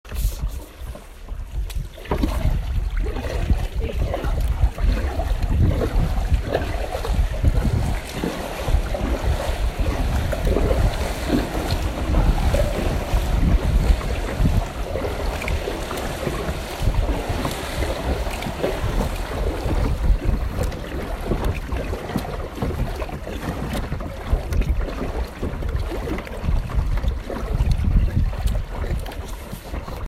Paddle bridge
paddling under Pontoon bridge, Ireland